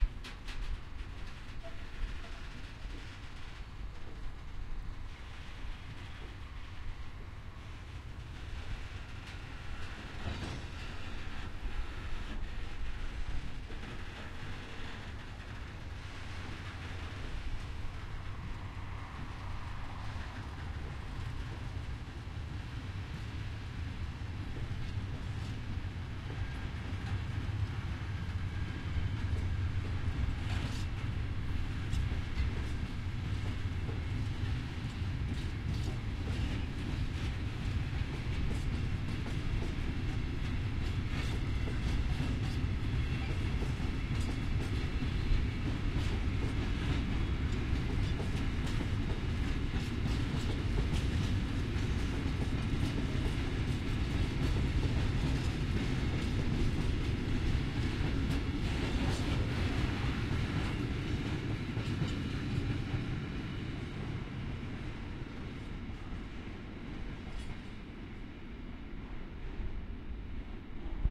Freight train with cargo containers starting to ride on a dutch railwaystation.
Recorded with Zoom H1
cargo-train, freight-train, locomotive, rail, rail-road, railroad, rail-way, railway, train, wagon